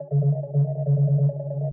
celestial melody 1 140
a little build up
fast, bpm, trance, 140, house, melody, melodic, techno, hard, bass